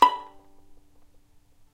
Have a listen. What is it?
violin pizz vib B4
violin pizzicato vibrato
vibrato, violin